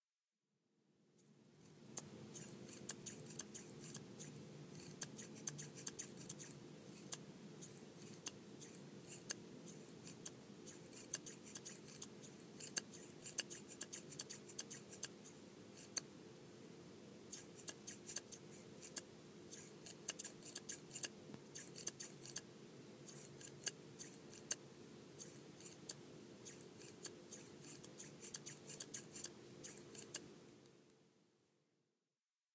Very sharp barber haicutting scissors snipping
barber, barber-shop, barbershop, cut, cut-hair, hair, hair-cut, haircut, hair-cutting, haircutting, snipping